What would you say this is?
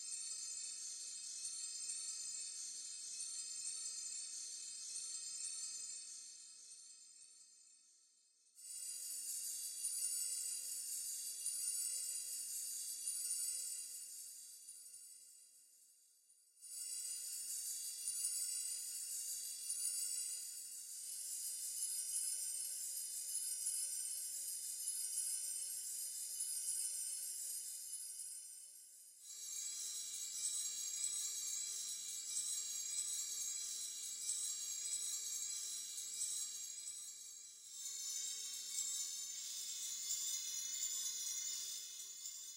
Shimmering Object
A shimmery noise, presumably from a magical object/artifact. Generated in Gladiator VST.
ethereal, magic, object, sparkle